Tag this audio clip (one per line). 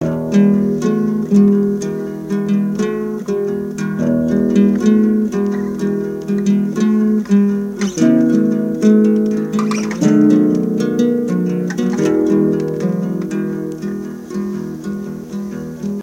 Indie-folk
loop
percussion
rock